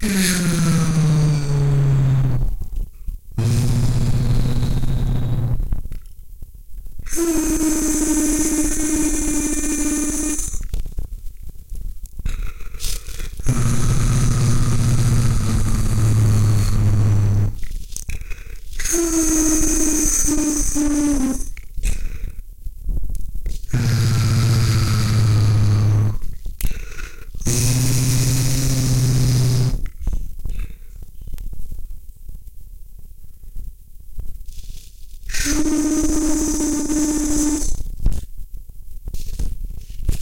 alien ship
the edited sounds of myself making noises into a microphone for an alien spaceshi